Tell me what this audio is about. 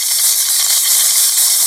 reverse lo-fi cymbal and sticks loop
Derived from a recording from 10/29/2016; I recorded myself banging on scrap metal with drum sticks, sampled that recording into a rudimentary looping app, overdubbed more scrap sounds, recorded the resulting loop, then finally cut up that recording into a smoother loop. Sounds simple enough.
crash, cymbal, drum, element, hi-hat, improvised, loop, music, percussion, percussion-loop, sticks